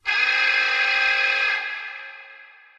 Warning Alarm
Made with an electric shaver, Lowered the pitch added Reverb and a Flanger effect using FL Studio.
Equipment used: Audio-Technica ATR2100-USB
Software used: Audacity 2.0.5